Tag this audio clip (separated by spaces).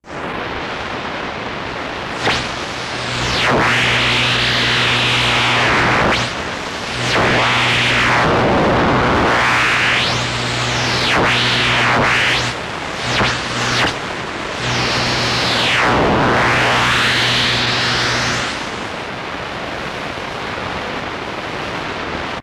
Interference Noise Radio Radio-Static Static